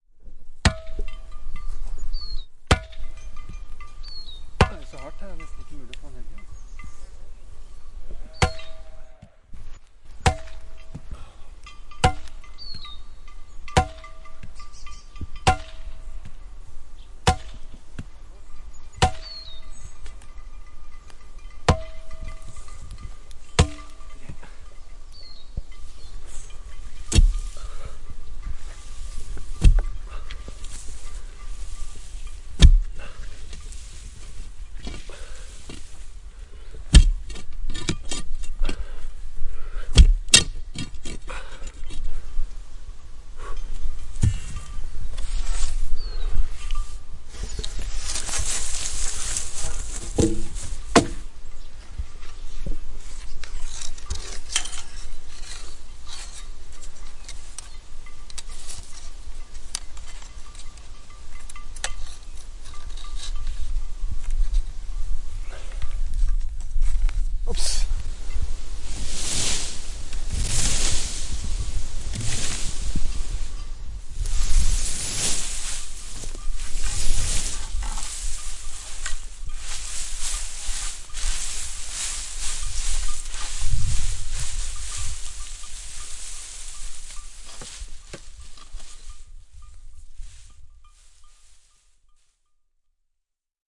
haymaking at flaret
A small group of people collected hay on a meadow by means of sweeping the hay into mounds and placing it on hay racks by means of pitchforks. A couple of guys set up hay racks by knocking a sharp, short pole obliquely into the ground to be used as support. Next, they used a crowbar to make holes in the ground for the large poles which were assembled in a row. Finally, a short oblique pole was knocked into the ground on the other side of the high poles and steel wire was attached t one of the oblique poles,then attached to the long poles by one loop on each pole until it was attached to the oblique pole at the other end. Birds were singing and sheep with bells were grazing nearby.